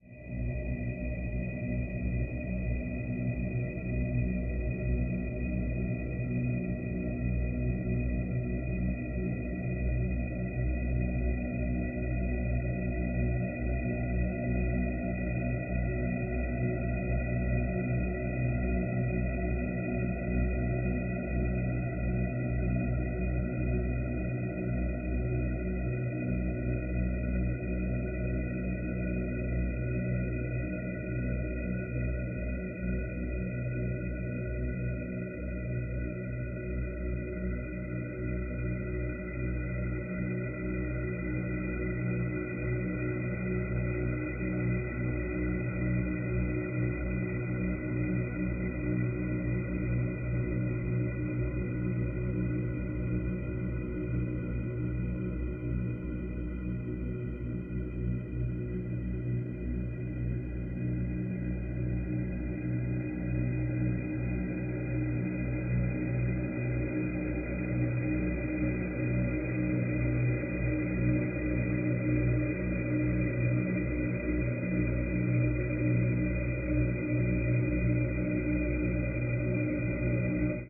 Spooky Ambiance #2
creepy, ambience, spooky, eerie